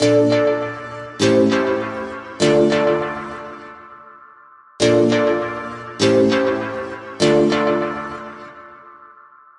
100 BPM Dm Chords
I was demoing Serum and have made a few loops for you all
100, Dm, acid, bass, bpm, chords, dance, drum, electro, electronic, future, house, loop, music, serum, synth, techno, trance